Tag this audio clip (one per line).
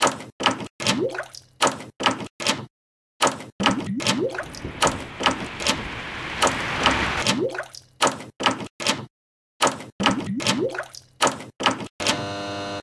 door
150bpm
door-handle
simple
bubble
buzz
rhythm
Joana
loop
fridge
4bars
refrigerator
buzzing
bubbling
seamless-loop
rhythmic
loopable
Continuum-4